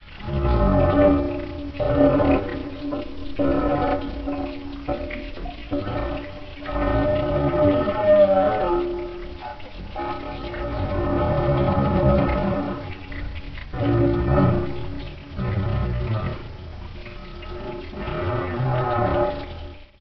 Washing a pyrex baking dish in soapy water, emphasizing the resonant qualities of fingers against wet glass. Recorded with a Zoom H2 in my kitchen. The recordings in this sound pack with X in the title were edited and processed to enhance their abstract qualities.
baking-dish, percussion